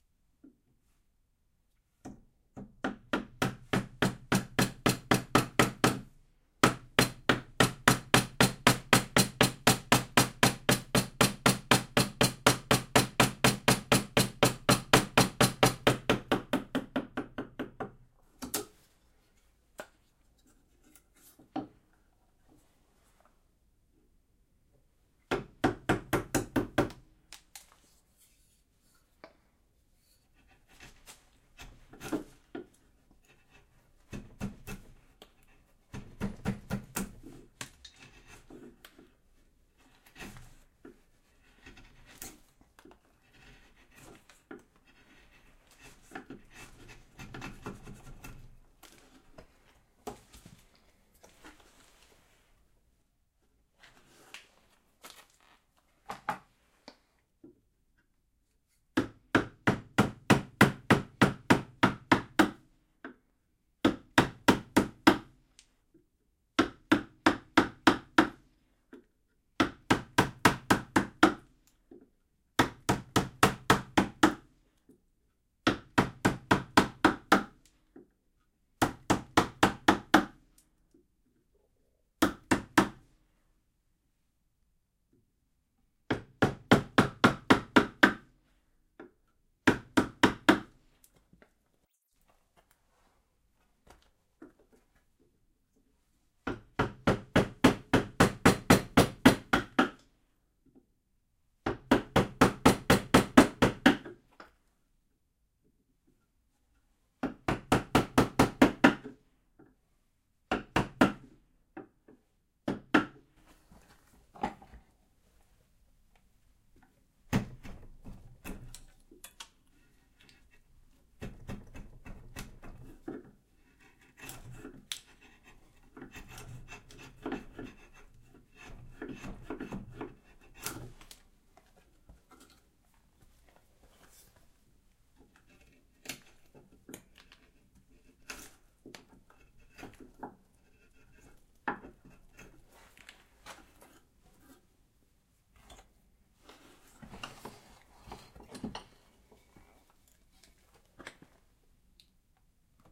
Wood Carving Off Mic
A stereo field recording of woodcarving, where the carver chops out a piece of Ash (Fraxinus excelsior) with a gouge and mallet to begin with and then finishes by hand.Captured in a stone walled, slate roof workshop with the mic(s) about 3m from work.The metallic sound heard during chopping is the vise handle rattling. Recording chain- Rode NT4>Fel Battery Preamp>Zoom H2 line in.
bench, carving, gouge, mallet, stereo, tapping, tools, wood, woodcarving, workbench, workshop, xy